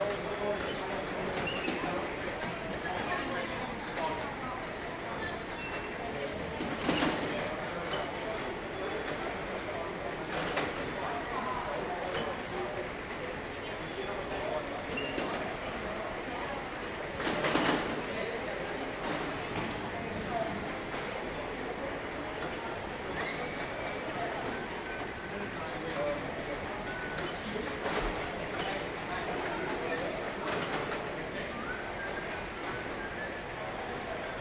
Oyster Card Gates, London Underground
Recording of people using the Oyster Card gates at Bank Station, London Underground.
bank, card, gates, london, oyster, station, tube